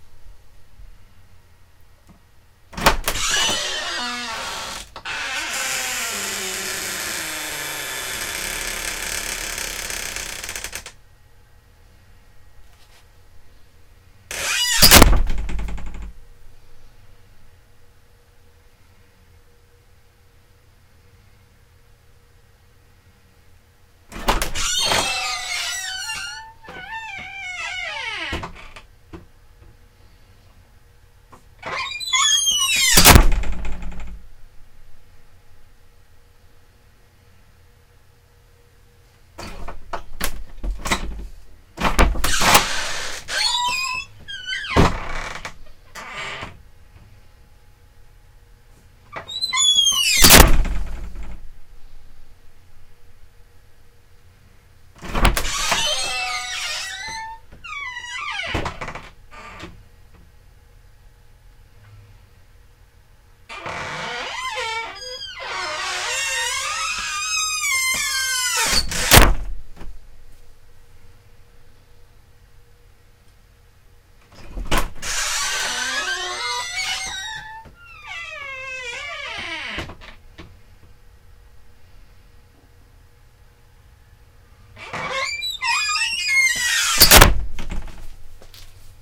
creaky wooden door and handle no clock-middle
Recordings of the epic creaking sounds from my office door. Great effects here for classic horror or just foley for an old house.
This is a old-fashioned six-panel wooden door with a metal handle (not a knob). I installed it in about 2008 or so, and have never oiled the hinge, so it's got a pretty wicked creak now in 2013.
For this recording, I eliminated the clock sound and reduced the mic gain a little, but the door thumps still clip.